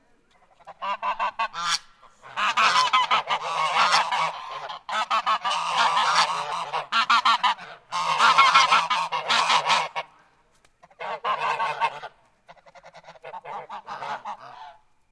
cibolo geese05
Geese honking at Cibolo Creek Ranch in west Texas.
animal, geese, honking